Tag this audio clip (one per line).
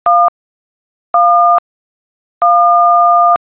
1
tones
key
one
dtmf
keypad
telephone
button
dial